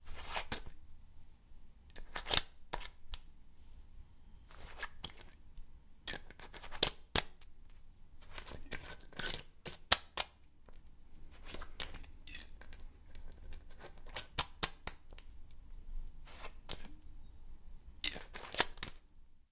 unscrewing and screwing the metal lid on an old glass cola bottle. Shaking the bottle with water inside as well.
aluminum, glass, screw, lid, unscrew, cap, water, shake, metal, bottle